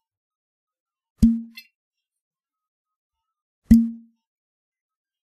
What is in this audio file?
open whiskey bottle
A whiskey bottle being opened.
Recorded with a Zoom h1.
whiskey, bottle, open